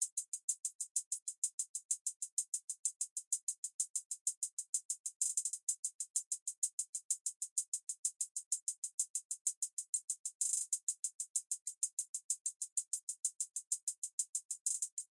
Hi-Hat Loop 3 (127 bpm)
Hi-Hat loop at 127 bpm. Good for hip-hop/rap beats.
hat, hat-loop, hihat-loop, hihat, hi-hat-loop, beat, hi-hat, loop